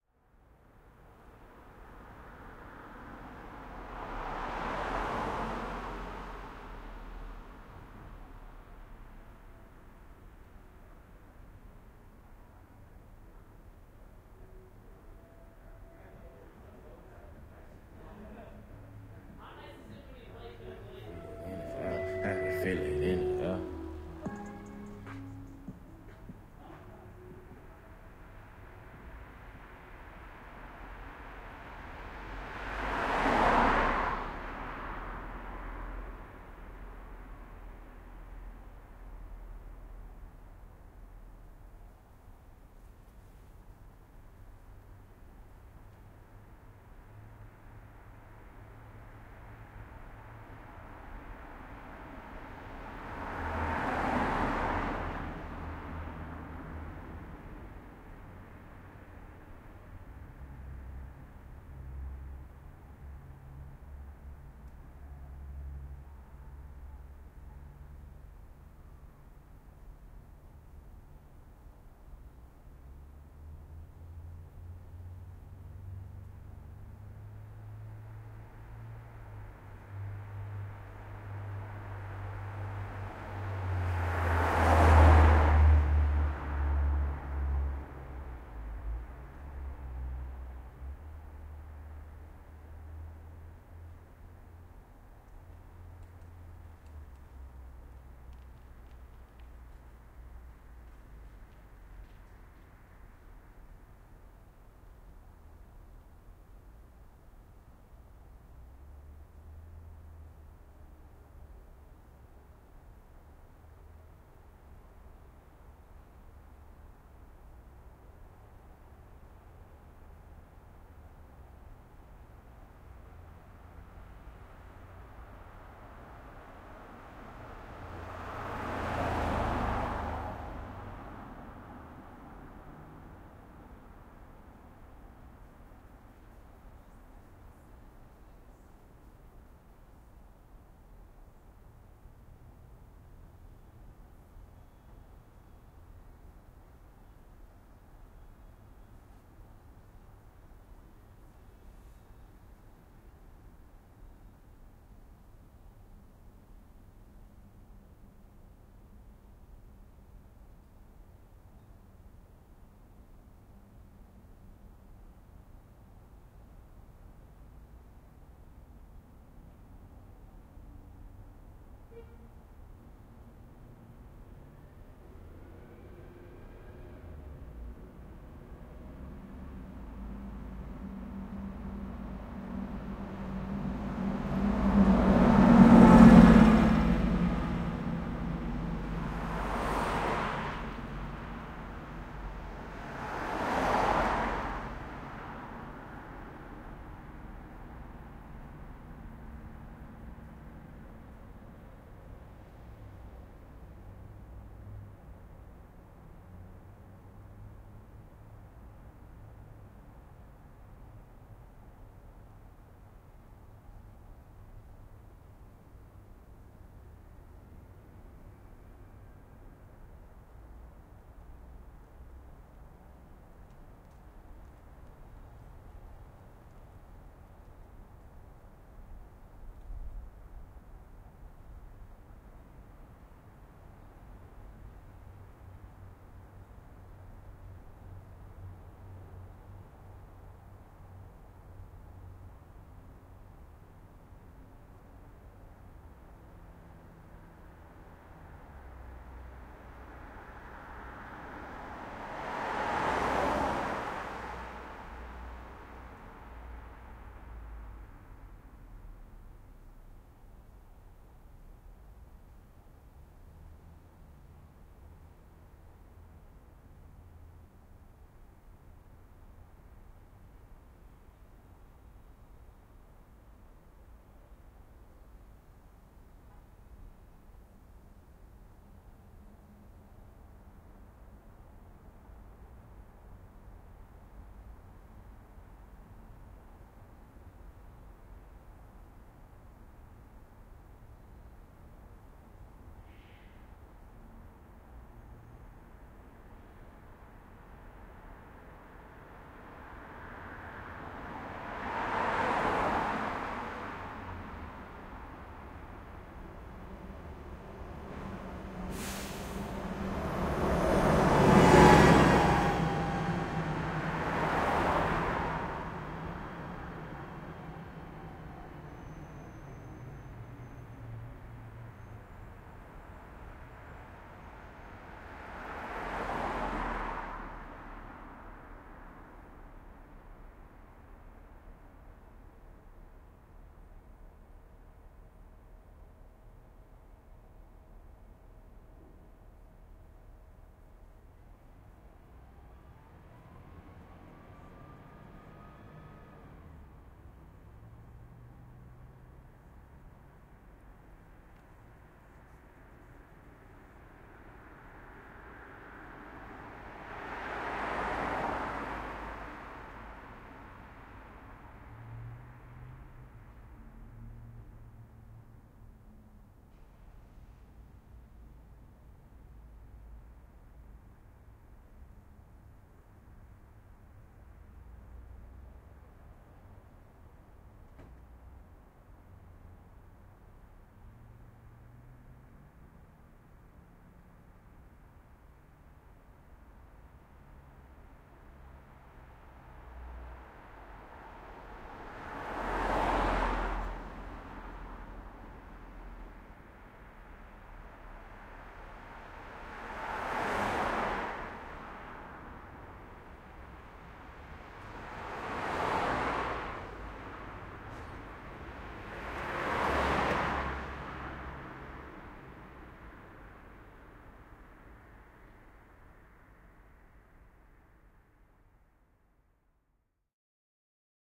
car-by series, bformat, whoosy
Series of car/truck/bus passes recorded on the side of a night time city street. At :21 two guys on bikes with a boombox pass - one of the weirdest sounding things I've recorded.
Ambisonic b-format recording recorded with the Coresound Tetramic in Pittsburgh, PA. *NOTE: you will need to decode this b-format ambisonic file with a plug-in such as the (free)SurroundZone2 which allows you to decode the file to a surround, stereo, or mono format. Also note that these are FuMa bformat files (and opposed to Ambix bformat).
ambisonic, b-format, carby